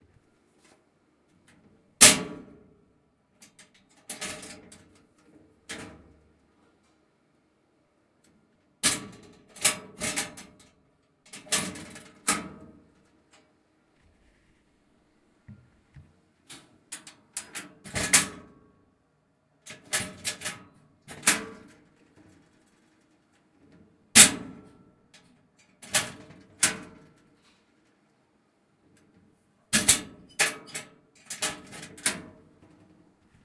LOCKER OPENCLOSE
Tascam D-100 placed in metal locker
open, Locker